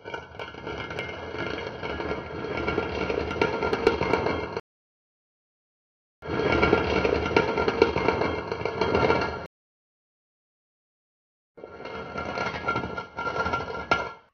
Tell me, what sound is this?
If you've heard Jeff Wayne's musical "War of the Worlds", you'll recognise this. It's completely done from scratch if you pardon the pun, but similar to the Martian landing cylinder unscrewing on CD 1. This is a panned stereo version for extra effect. The lid falling isn't included because I haven't figured out how to recreate it. The original sample is a tin can being scraped over a concrete block.
scraping, unscrewing, war-of-the-worlds, opening